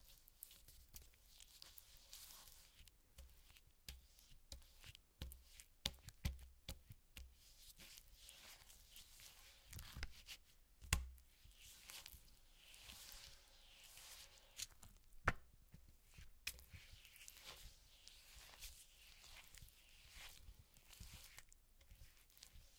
Randomly moving a wet brush on a piece of paper
brush, paint, painting, wet